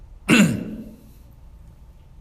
Clearing Throat Trimmed
Sound of a man clearing his throat. This was recorded on my iPhone7 Plus. No added equipment and I used the default Voice Recording app that comes with almost all iPhones.
human, sound, throat